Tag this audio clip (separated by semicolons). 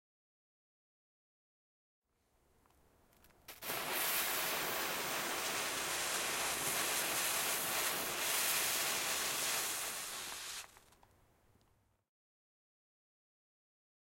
CZ; CZECH; fire; fuze; Panska